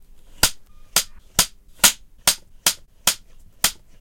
mall ambiance people walking and talking about
crowded, mall, people